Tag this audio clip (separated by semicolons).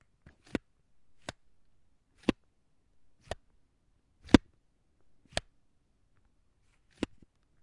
impacts; foley; tennis; racket